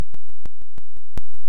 A glitchy electronic sound made from raw data in Audacity!
text, audacity, 8, computer, 8bit, electro, 8-bit, public, computerized, domain, edited